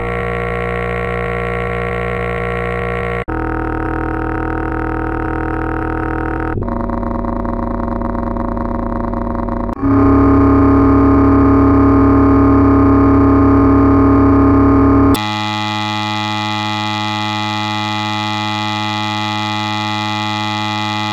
scientific apparatus-cart(03)
The sound is what I know of the tones and general electronic
sounds that scientific instruments make in laboratories.
Partly imagined, partly from documentaries.